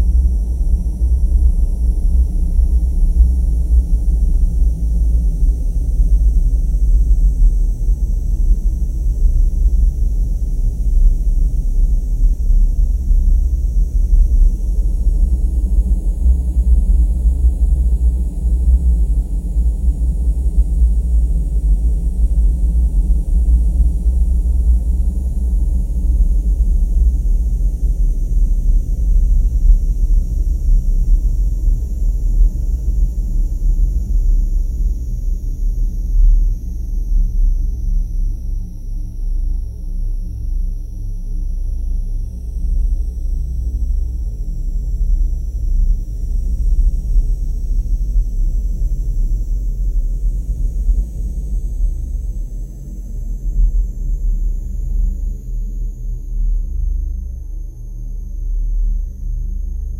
IMG 4342 1kla
the sample is created out of an image from a place in vienna
Thalamus-Lab, synthesized, image